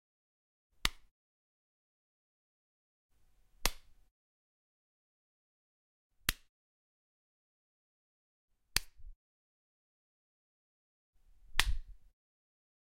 Various slaps, subtle